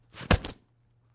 body-fall-4
One of the sounds was created by me literately throwing myself on a hard concrete surface, the other 3 were created by dropping a punching bag on the same hard cold surface.
This sound is part of the filmmakers archive by Dane S Casperson
A rich collection of sound FX and Music for filmmakers by a filmmaker
~Dane Casperson